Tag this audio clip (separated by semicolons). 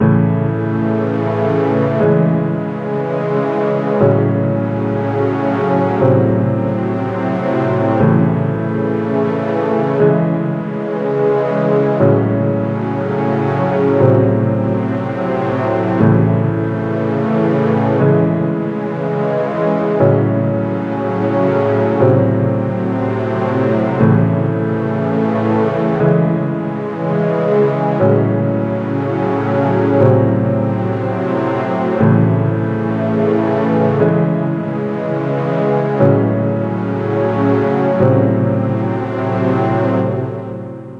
ambient; drone